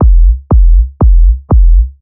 plain synth kick
kick, synthetic